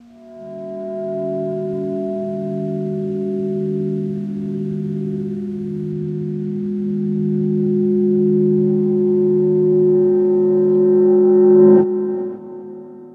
Pre-syncope
73bpm, B, fx, korgGadget, noise